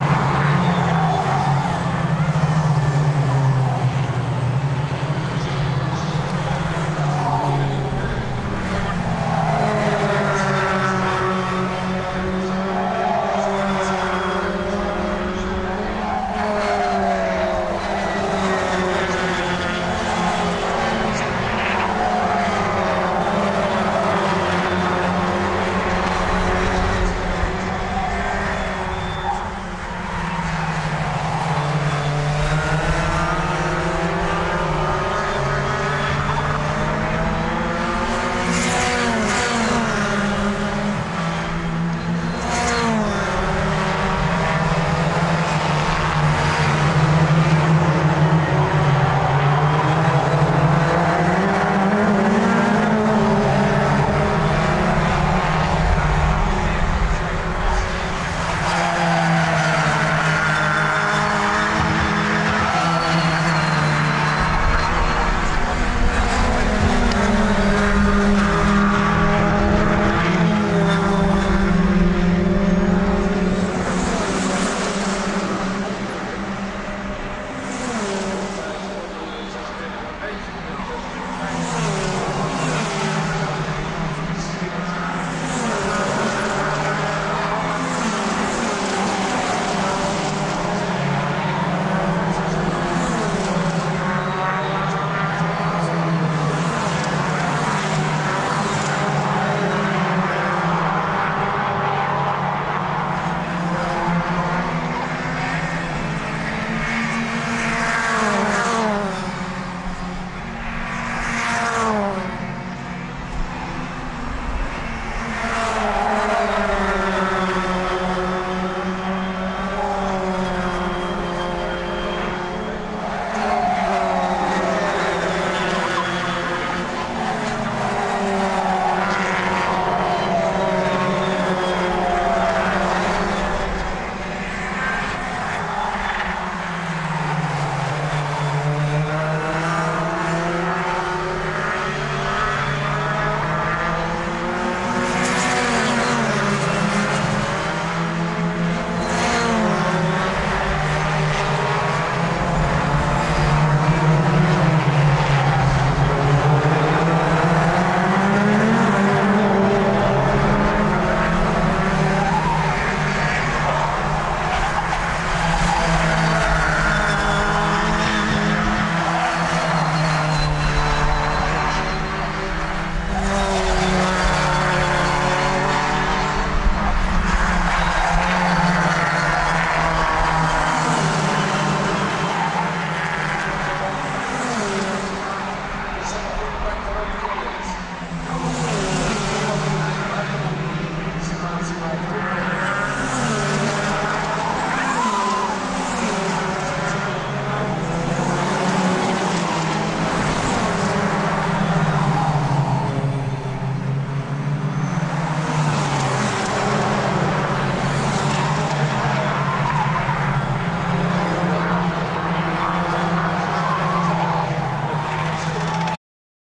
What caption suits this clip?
FORMULA FORD
Mixed for television broadcast with 12 on-camera sennheiser 416p's
car, ford, formula, racing, zandvoort